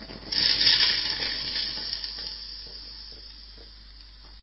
Water on sauna heater 3
Water on sauna heater
cracking, heater, Sauna, sissling, water